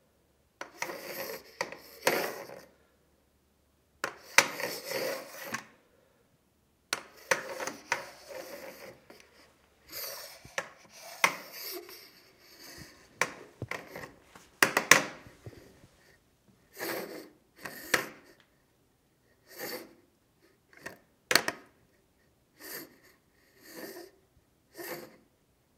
Aluminum metal walker cane, scrape slide on bathroom tile floor
Aluminum walker sliding on tile
aluminum, bathroom, cane, crutches, floor, metal, metallic, scrape, slide, steel, tile, walker, wood